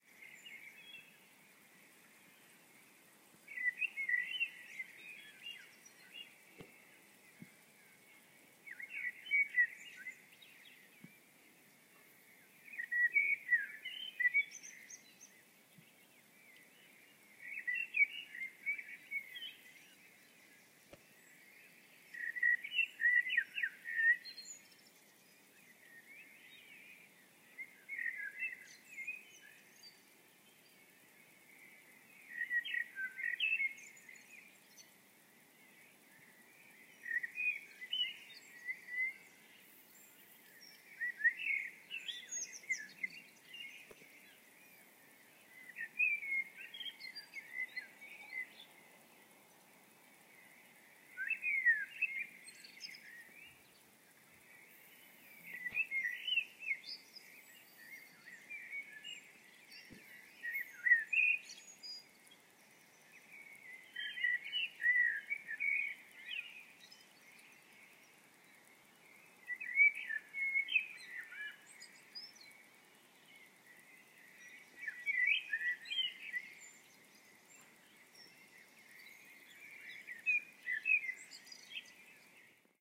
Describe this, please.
Birds Singing at Dawn
I made this recording at 5am in Manchester, UK.
city, spring, nature, birds